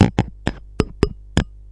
some odd percussion, made with a bowl stroke by the microphone